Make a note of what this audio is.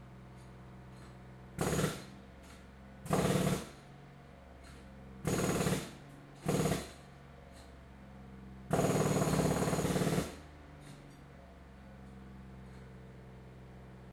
Sounds of demolition of the newly laid concrete base with jackhammer on diesel powered compressor, Short takes. Daytime, residential area of Minami Magome. Recorded at approximate 7 meter distance on Tascam DR-40 with self made wind shield, manual level, no low cut filter. No editing. 22nd of June 2015